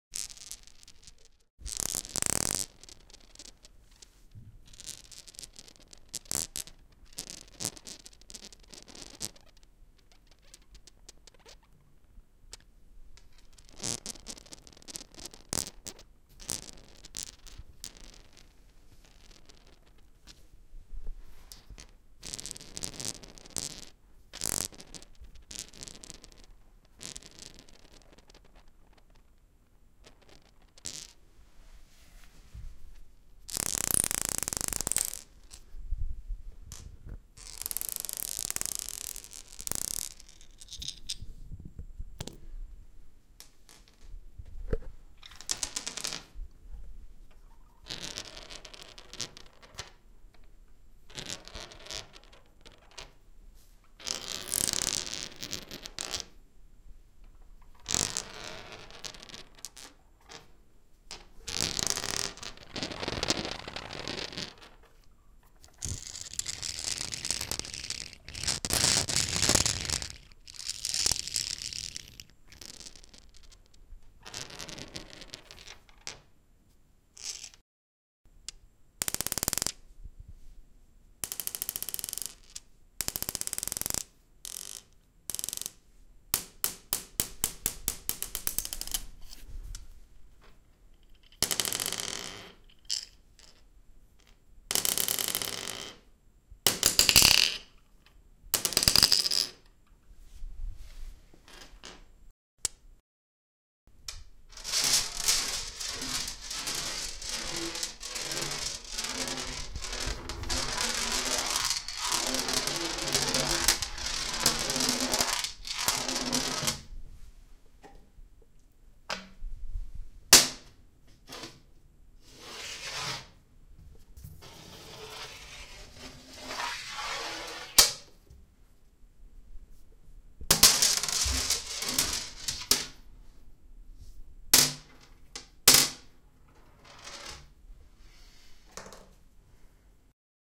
Marble rolling on a stone bathroom floor and inside a bathtub.